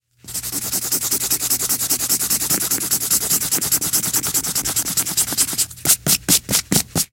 writing-long-sketch-04
Writing on paper with a sharp pencil, cut up into phrases.
drawing, foley, paper, pencil, sfx, sound, write, writing